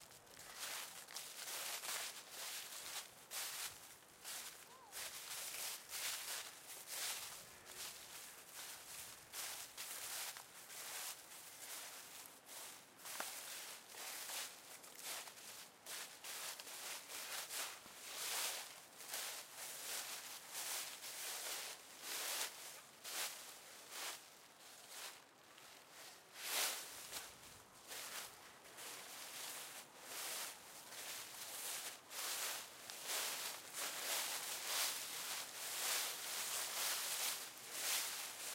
Walking in leaves recorded with Zoom H4
Ambiance, Countryside, outside, Park, People, walking